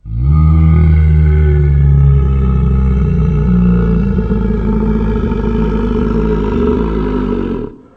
The adult phase of a growing (now grown) monster
Recorded using NGT-2 directly by laptop microphone in. Pitch shifted using Audacity.
fx, monster, voice